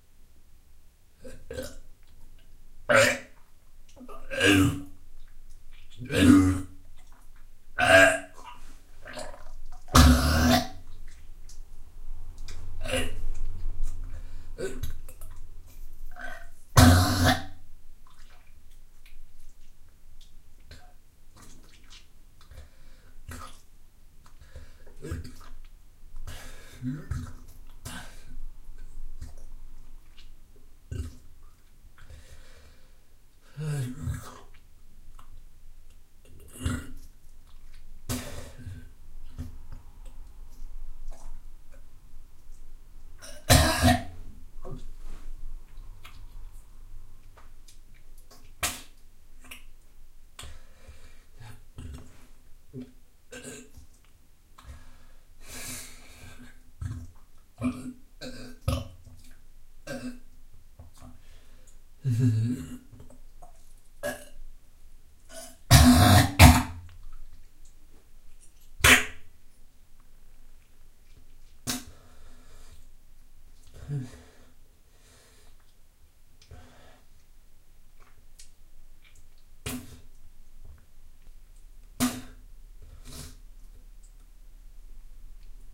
Recorded in a small bathroom with little background noise with the M-Audio Microtrack recorder.